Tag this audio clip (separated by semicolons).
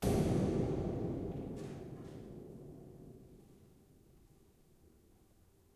percussive; unprocessed; resonant; metalic; experimental; hard; metal